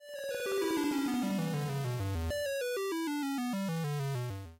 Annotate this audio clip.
Retro Melodic Tune 13 Sound
retro, old, computer, sound, loop, melodic, sample, original, cool, school, game, tune, 8bit, effect